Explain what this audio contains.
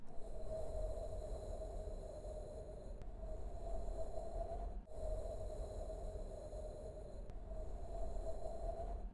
Soft Wind
domain, foley, public, studio-recording